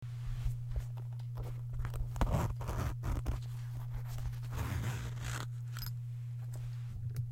Opened a zipper on a makeup bag
zipper, opening